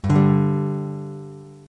Yamaha F160e Acoustic Electric run through a PO XT Live. Random chord strum. Clean channel/ Bypass Effects.